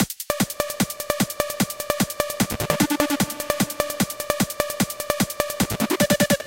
Intro Loop i made for a project, very basic en very simple but nice to start with.
Clap and Closed Hat + screech and some FX with that.
Programs used ..:
Fl Studio 11 ( + Build In Clap & hats )
Sylenth 1 ( for the screech )
Glitch 2 ( for the FX )
it this thing on ?